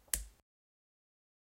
SwitchOff SFX
switch off sfx
switch, off